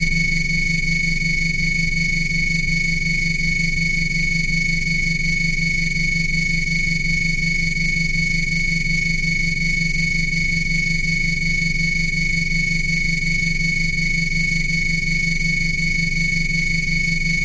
hypnotic texture simulating some weird machines running in background
ambience, ambient, atmosphere, backgroung, drone, electro, engine, experiment, film, fx, illbient, industrial, machine, noise, pad, sci-fi, score, soundeffect, soundscape, soundtrack, strange, suspence, texture, weird